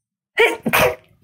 When you don´t find what you´re looking for, it´s better to do it by yourself :-). My sneeze recorded with an HTC U11 Plus and corrected in Adobe Audition.
allergy, chills, cold, loud, sneeze, woman-sneeze